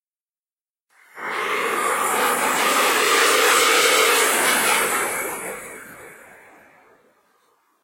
Spaceship Flyby 5
The queer spacecraft soars through deep space, its engines rumbling. If this describes your sound needs you've found the perfect sound! Could also pass as a ambient effect. Made by paulstreching my voice in Audacity. I always appreciate seeing what you make with my stuff, so be sure do drop me a link! Make sure to comment or rate if you found this sound helpful!
alien, aliens, engine, engines, fi, fiction, fly-by, flyby, future, futuristic, outer-space, sci, science, science-fiction, sci-fi, scifi, space, spaceship, starship, ufo